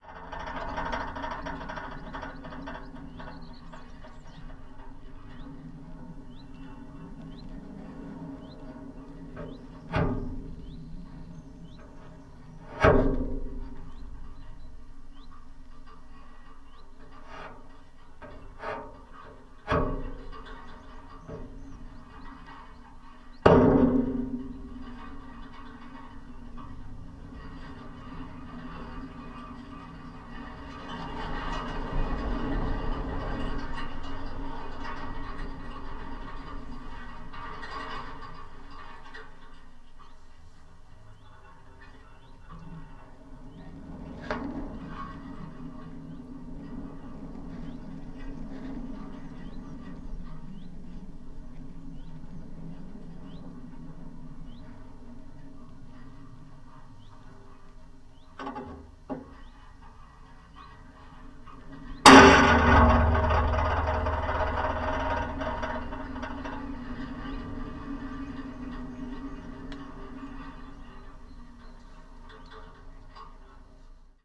A mono field recording of a barbed wire fence on a blustery day. Two cows were jostling by the fence about 50m away, occasionally making contact. Piezo contact mic > Sony PCM M10
metal, contact-mic, steel, boing, twang, wire, rattle